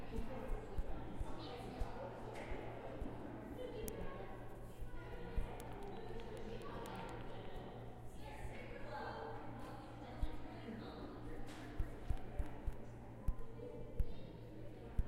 Phone Noises 1
iPhone touch screen noises
touch, iPhone, screen, noises